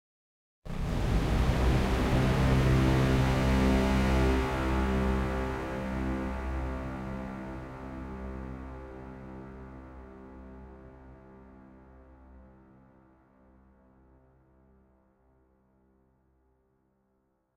Mechanical synth swell FX pad.
Kinda dark undertones with a bit of distortion.